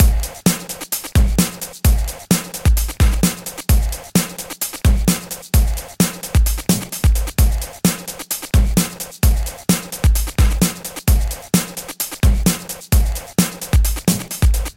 Don't really like this one so much, but maybe you will. Drum loop created by me, Number at end indicates tempo
drum, beat, breakbeat, loop, drumloop